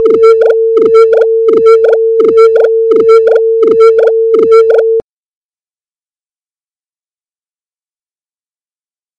I mixed a sound looking like the sound navigation of a submarine.
I used severals and differents effects like the phaser on a sinusoïdal sound.
I changed the frequency and the rythm which is slowly in order to make this sound.
field-recording, mixed, navigation, sound, submarine